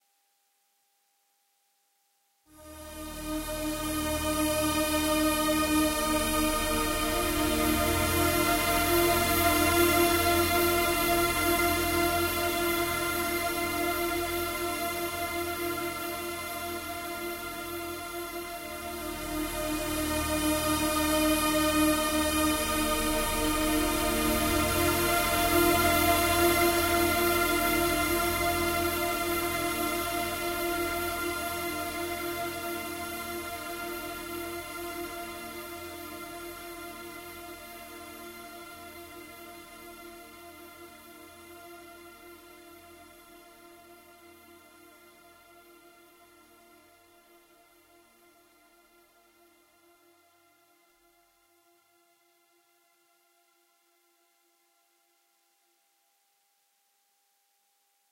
Melodie Anfang 2
Melodie voice synth space sadness
Melody, venus, mekur